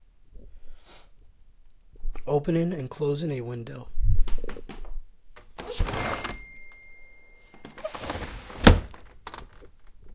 Recorded with a condenser mic, opening and closing a window
window, close